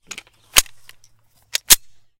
9mm pistol load and chamber
A 9mm pistol being reloaded. Clip loaded and bullet chambered.
sidearm, reload, chamber, clip, pistol, handgun, cock